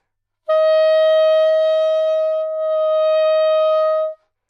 Sax Soprano - D#5 - bad-timbre
Part of the Good-sounds dataset of monophonic instrumental sounds.
instrument::sax_soprano
note::D#
octave::5
midi note::63
good-sounds-id::5756
Intentionally played as an example of bad-timbre
Dsharp5, good-sounds, multisample, neumann-U87, sax, soprano